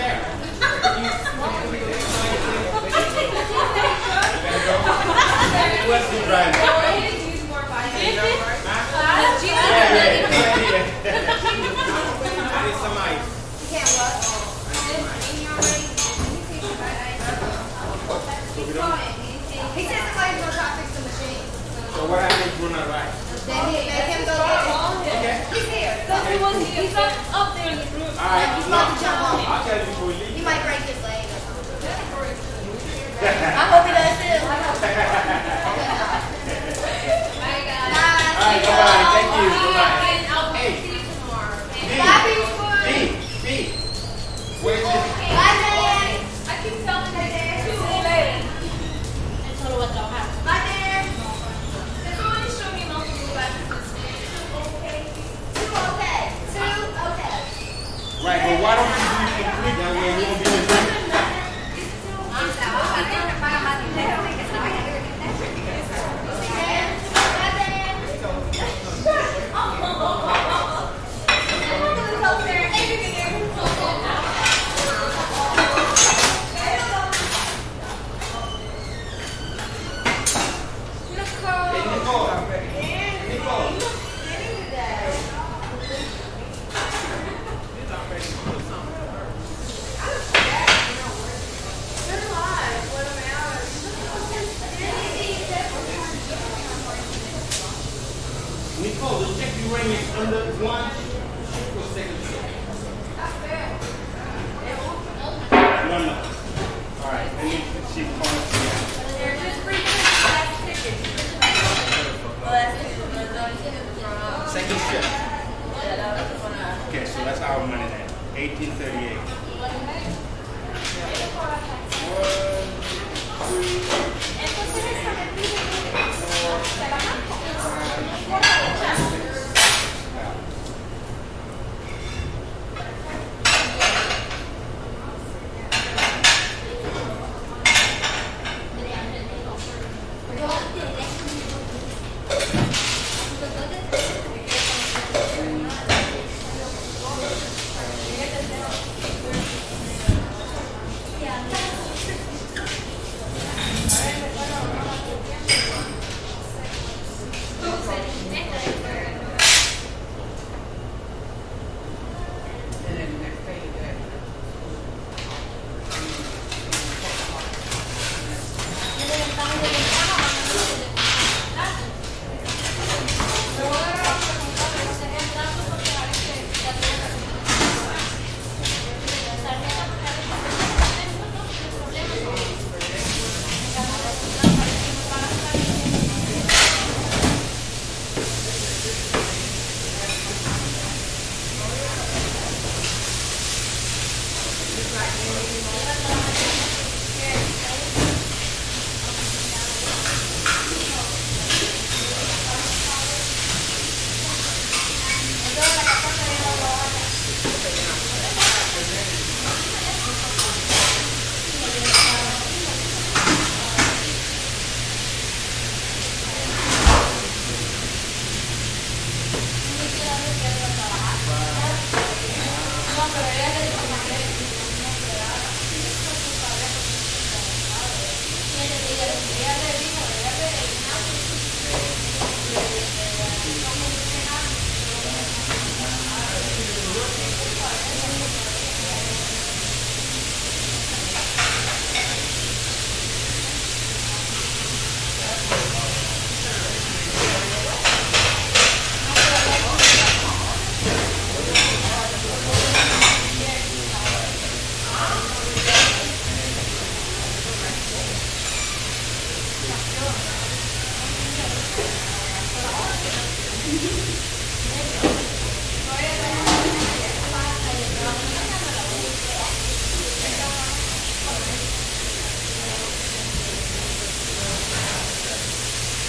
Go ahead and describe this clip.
diner american light crowd int afternoon1
afternoon, american, int, crowd